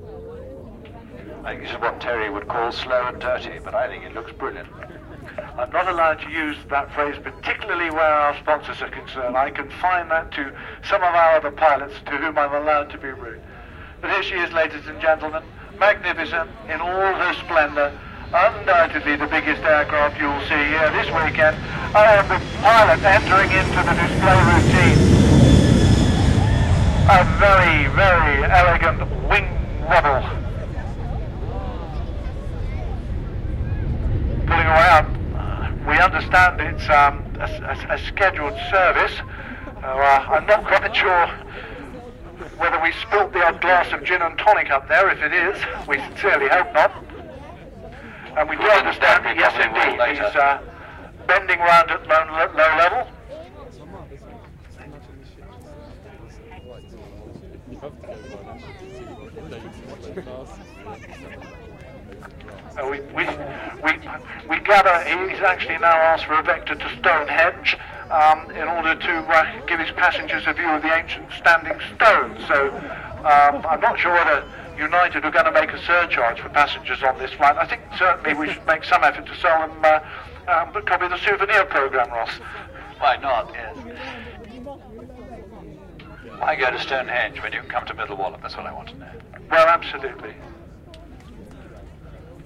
Airshow Snippet 1
Recorded with Sony MZ-R37 minidisc recorder and Sony ECM-MS907 about 12 years ago at Middle Wallop Airshow. The airshow is quite a small event, but the organisers managed to persuade a passenger jet to do a couple of low passes. The two old duffers doing the commentary are pretty funny...